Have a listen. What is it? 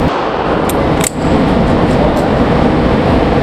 Lock and load